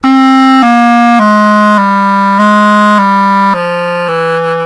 Gypsy 3 Clarinet 103bpm
From a recording I'm demoing at the moment.A clarinet walkdown. Part of a set.Recorded in Live with Snowball Mic.
walkdown, clarinet, melody